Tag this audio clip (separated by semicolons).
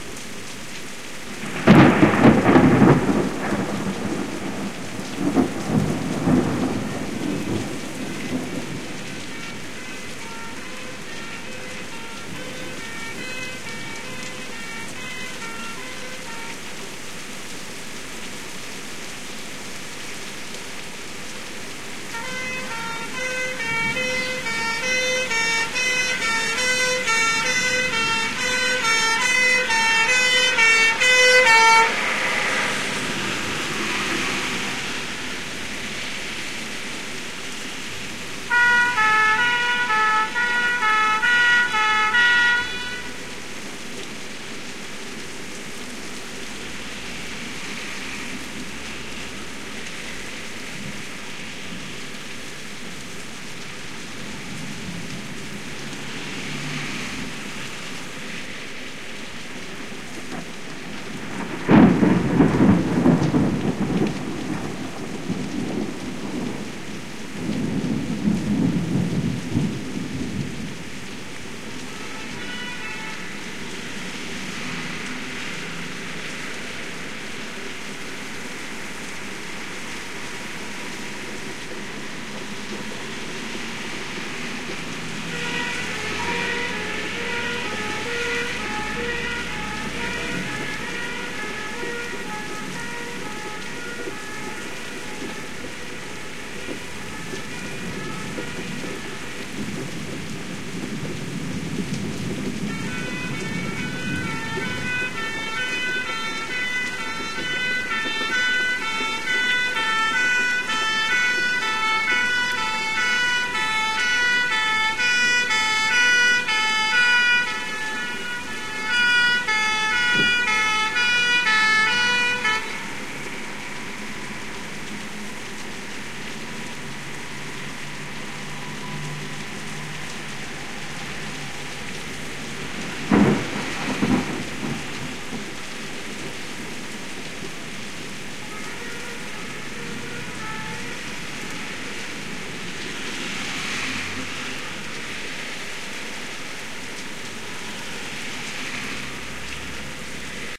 thunderstorm; engine; siren; old-style-english-fire-engine-siren